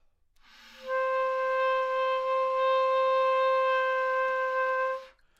Clarinet - C5 - bad-richness-pato
Part of the Good-sounds dataset of monophonic instrumental sounds.
instrument::clarinet
note::C
octave::5
midi note::60
good-sounds-id::2184
Intentionally played as an example of bad-richness-pato
C5, clarinet, good-sounds, multisample, neumann-U87, single-note